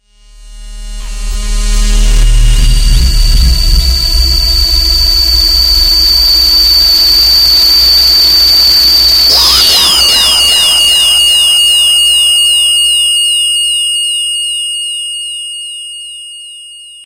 dub siren 15 1
A hoover pad that morphs into a shrill screech.
reverb, synthesized, scifi, dub, effect, siren, fx